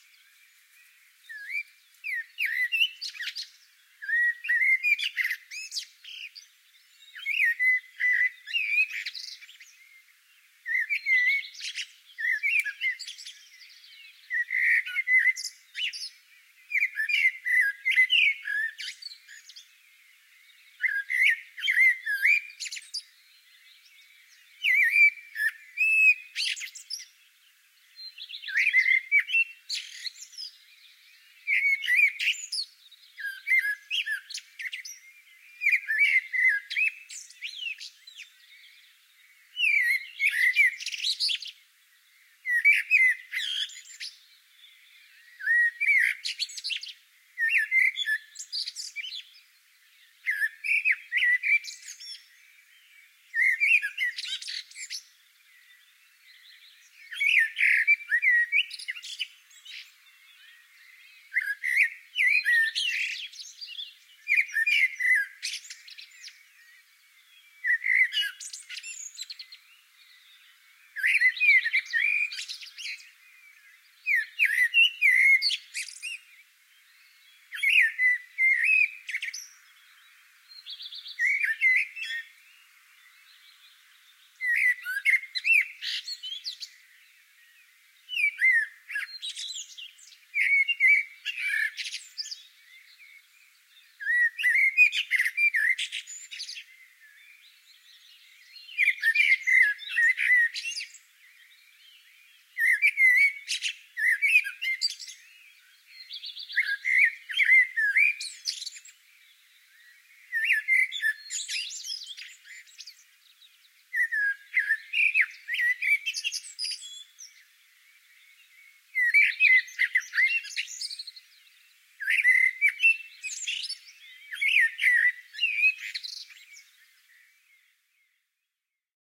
I put my microphones on my roof to be ready to record the birdsong early in the sunrise. Then there was a blackbird sitting close to the microphone and sang.
microphones two CM3 from Line Audio
And windshields from rycote